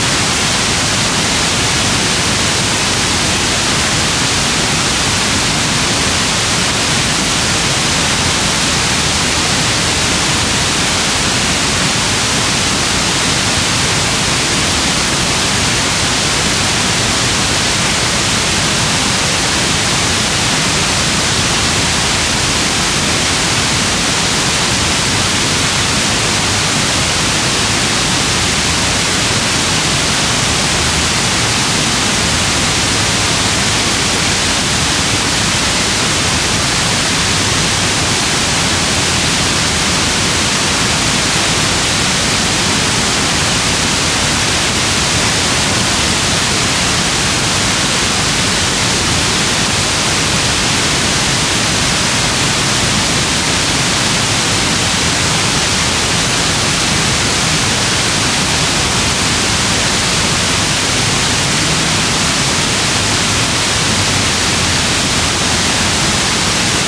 FM Static
A dual mono recording of an FM/VHF tuner.Red Book ( audio CD standard ) version.
fm, loop, mono, noise, off-station, pink-noise, radio, static, tuner, tuning, vhf